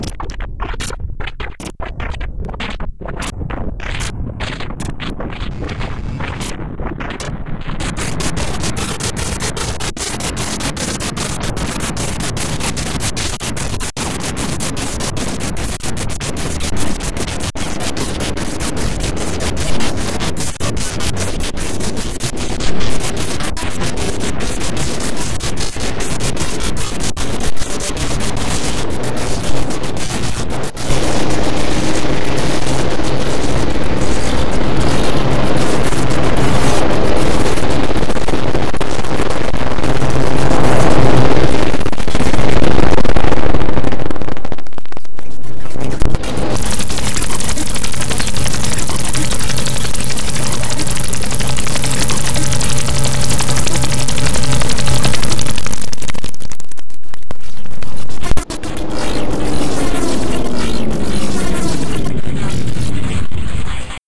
Sonic Debris
Decay, Dub, Glitch, Loss